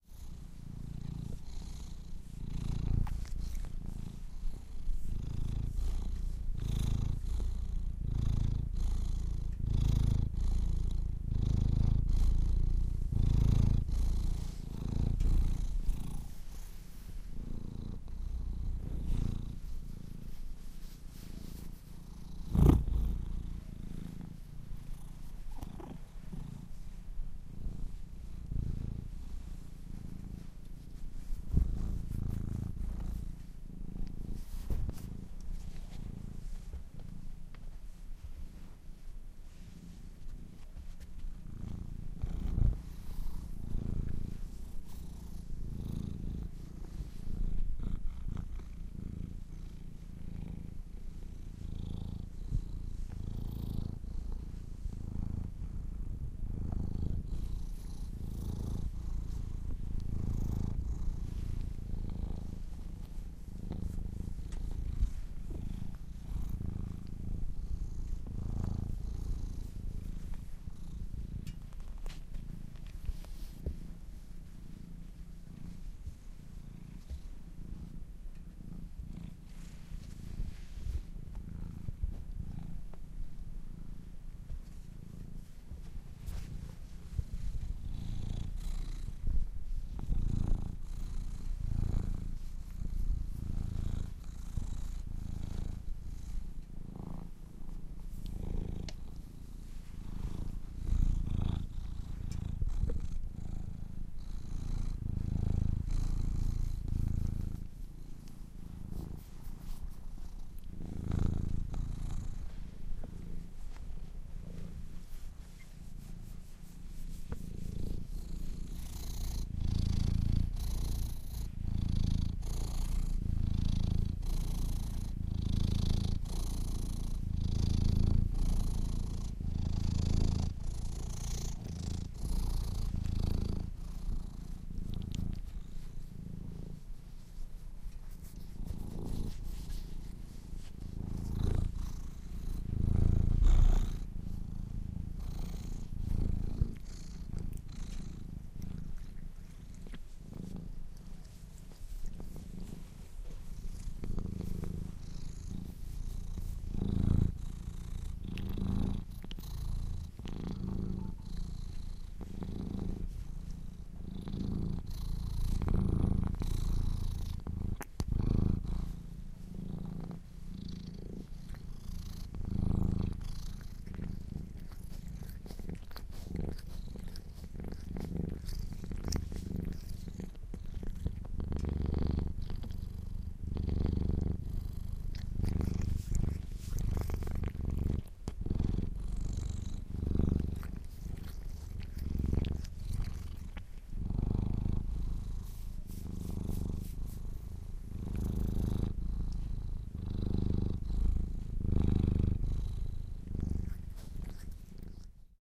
Cat Purring / Cleaning Fur
Just a cat purring, yours to edit and use!
Recorded with a Zoom H2 over Christmas holidays as my cat snoozed the day away by the fire...
Combined a couple of takes in Logic Pro but left the signal unprocessed.
animal, animals, cat, domestic, feline, kitty, pet, pets, purr, purring